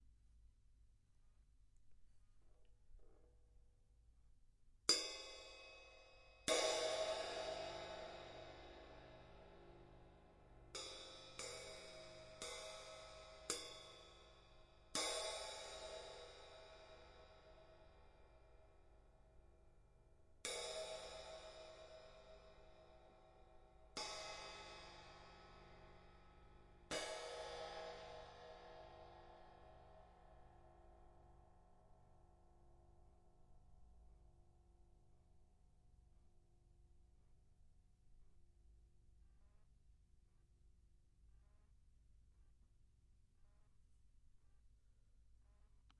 drum hats
recorder drum hat